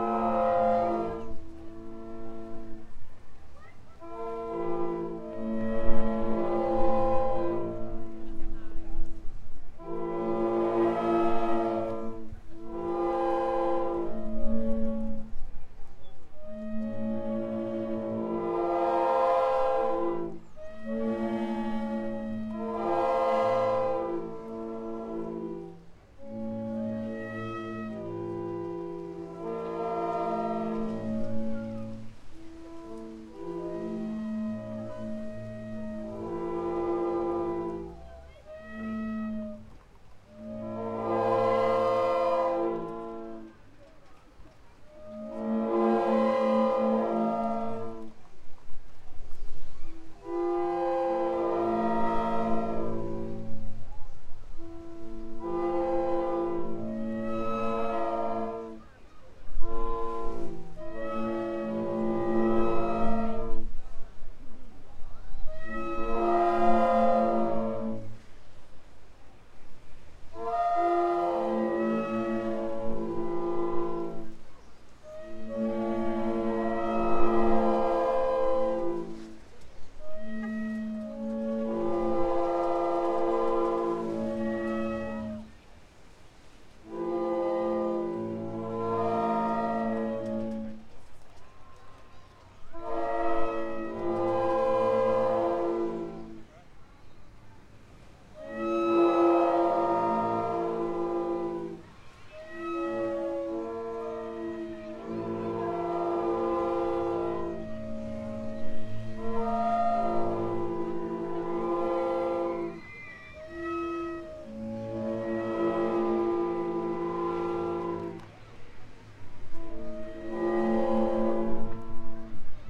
Blackpool High Tide Organ
United-Kingdom, Liam-Curtin, Blackpool, England, Blackpool-High-Tide-Organ, Tide-Organ, UK